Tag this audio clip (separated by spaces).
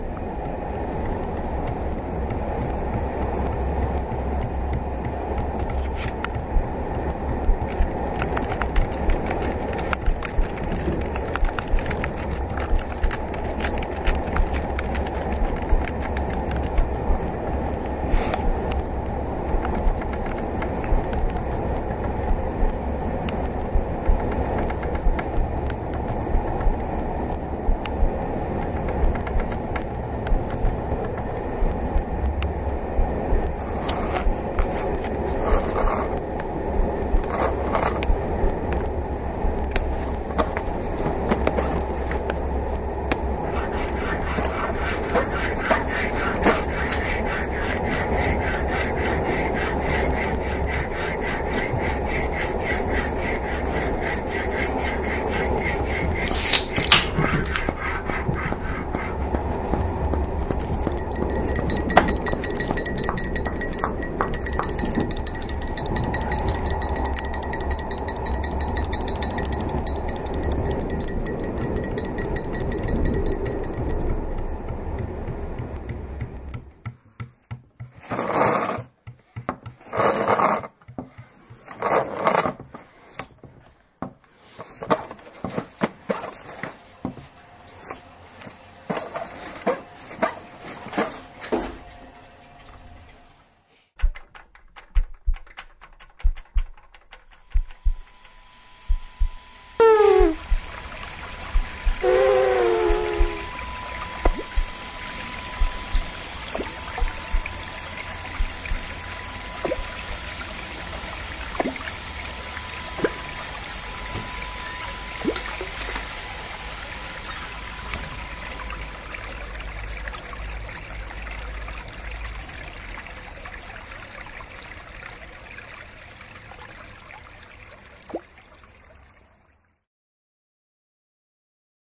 belgium cityrings mobi postcards soinc